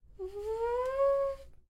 Sound of one siren, only once
alarm, siren, alert